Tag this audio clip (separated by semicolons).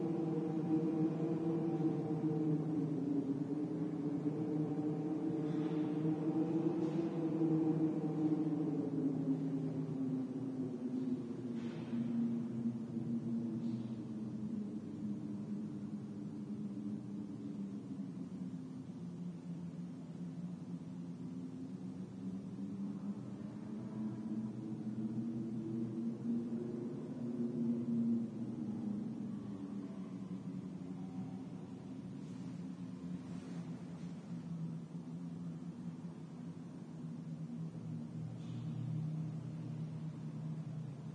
echo; spooky; stairwell; tower-block; wind